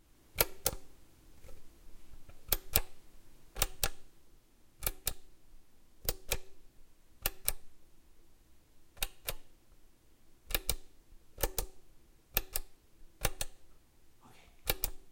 push button 03
Pushing on and off a larger button in a metal housing that resonates just a bit. Recorded with AT4021s into a Modified Marantz PMD661.